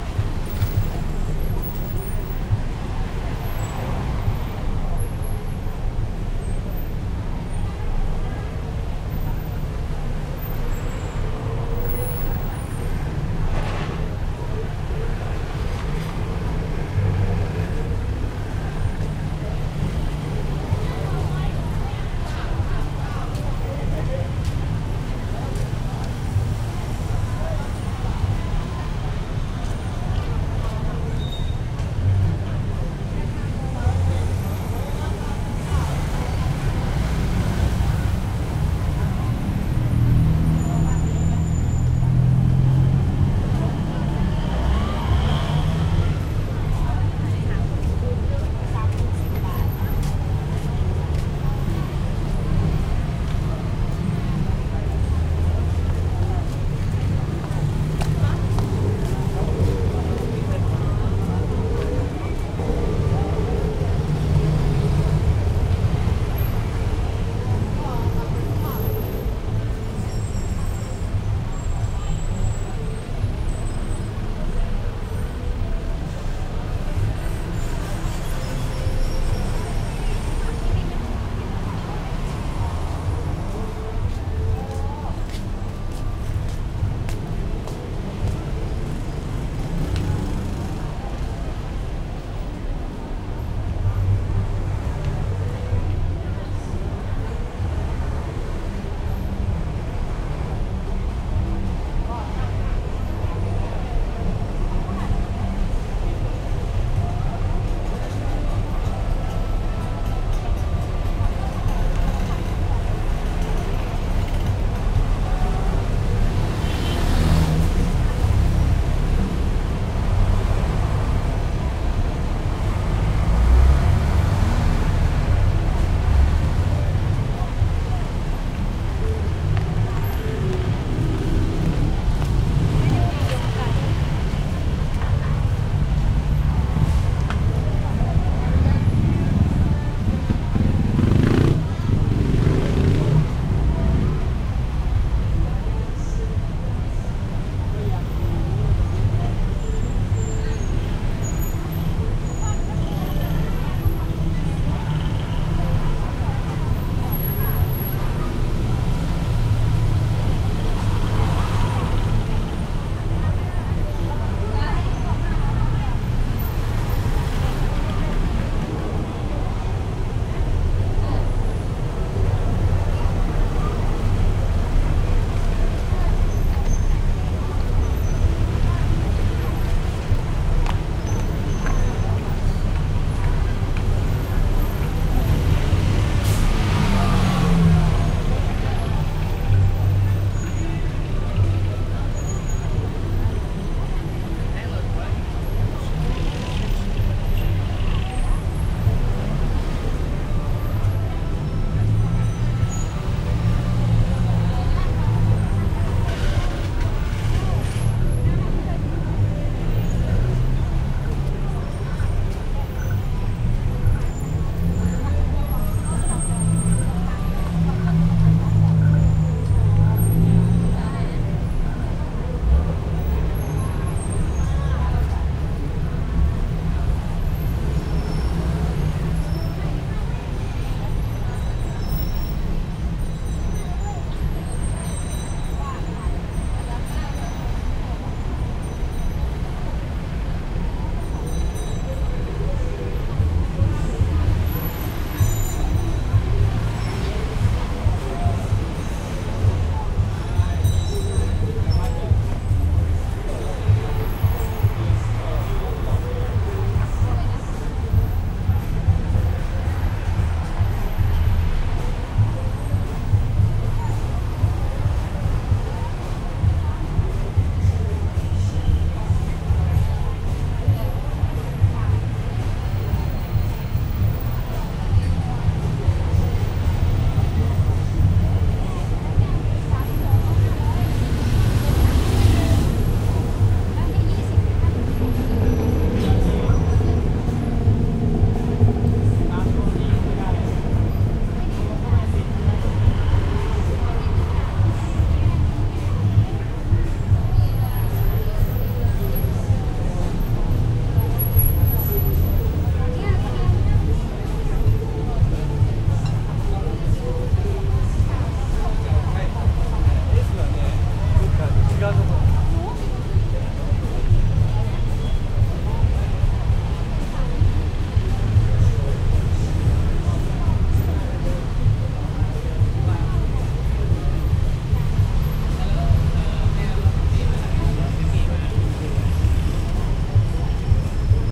The ambiance recorded outside Starbucks coffee. The place is next to the street so you can hear cars and motorcycles passing by.
Recorded with a cheap omni-directional condenser microphone.
cafe, car, cars, coffee, starbucks, street